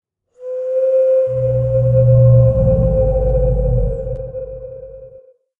Long howl) whale and monster
All my sounds were created for a motioncomic I created called: Kay & Gojiah... I did not create these from scratch, but instead, remixed stock sounds of different roars, growls, breathing, etc. and fiddled with their settings until I got a sound I felt satisfied with. I thought the best thing to do with them after the project was done is to share.